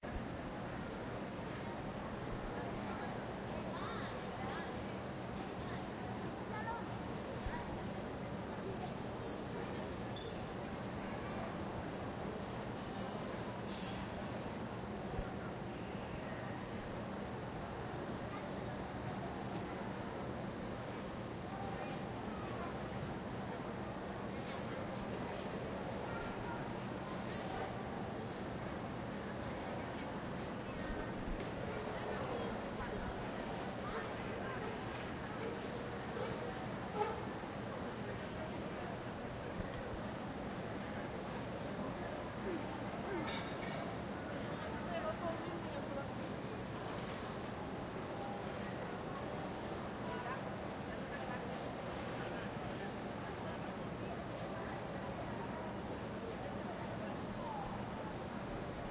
Crowd of people in a mall, in the food court.
Personas en un centro comercial en la zona de comidas
Recorded with Zoom H1